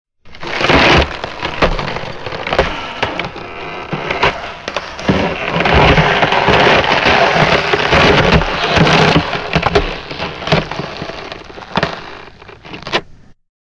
Ice 3 - Slow
Derived From a Wildtrack whilst recording some ambiences